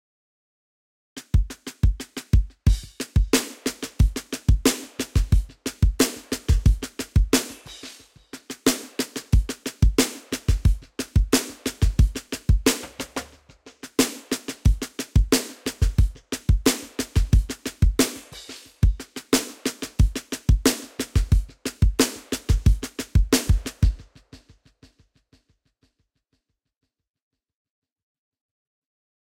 drums beat 2
It was created in Addictive Drums 2 with some changing in kick and snare. Also i have added some filters and EQ. Beat is in -6dB with a tempo 90 bpm.
Osnabrück,Deutschland
drum-loop, groovy, perc